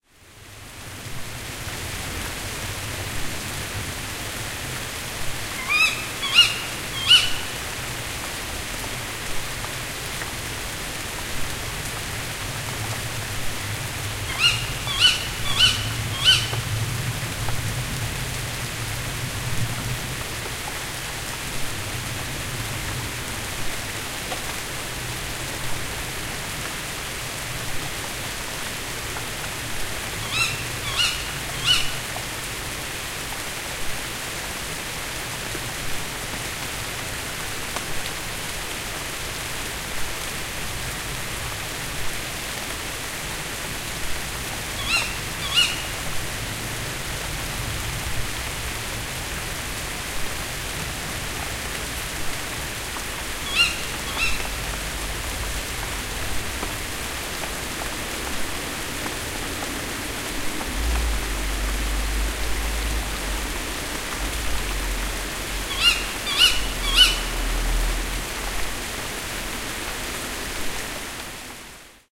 Female Tawny in the rain
Female Tawney owl calling in a summers night rain shower.
Rain-birds, Owl, Tawny-owl